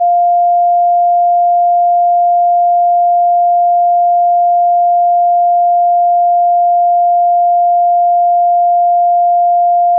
Set computer volume level at normal. Using headphones or your speakers, play each tone, gradually decreasing the volume until you cannot detect it. Note the volume setting (I know, this isn't easy if you don't have a graduated control, but you can make some arbitrary levels using whatever indicator you have on your OS).
Repeat with next tone. Try the test with headphones if you were using your speakers, or vice versa.